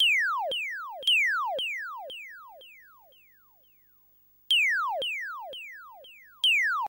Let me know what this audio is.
Electric Loop
electric, Loop, VirtualDJ